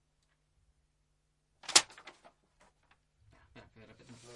Booklet - Throwing down on carpet 01 L Close R Distant
Throwing down a booklet made of some sheets of paper on carpet. Recorded in studio. Unprocessed.